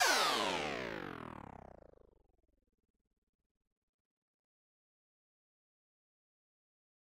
Shutdown small
Granular sounds made with granular synth made in Reaktor and custom recorded samples from falling blocks, switches, motors etc.
granular, noise, synthesis